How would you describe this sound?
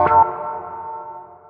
click sfx8
This is a pack of effects for user-interaction such as selection or clicks. It has a sci-fi/electronic theme.
click, effects, feedback, fx, icon, interaction, response, select